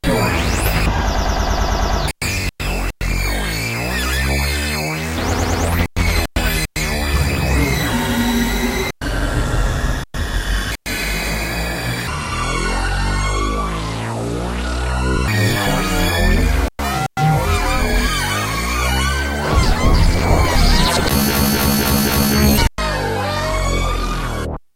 These are my own original sounds created using FL Studio Pro, Audacity, Yamaha PSR463 Synthesizer and Zoom R8 Portable Studio.
If you are into making your own cut and paste Dubstep style of music you might wanna take a look at all my sounds. I Have a wide variety of stuff here. In particular the Sound Effect Packs are loaded with good Dubstuff.
Free, Beats, BPM, Music, Synth, Keyboards, Dubstep, glitch, House, Techno, Jam, Loop, Backing, Bass, Wobble, Dub, scratch